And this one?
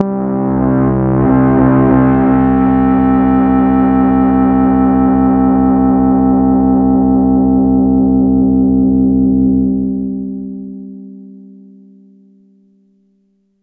Super FM Pad C2
An evolving pad type sound created on a Nord Modular synth using FM synthesis and strange envelope shapes. Each file ends in the note name so that it is easy to load into your favorite sampler.
digital, drone, evolving, fm, multi-sample, multisample, nord, note, pad, sound-design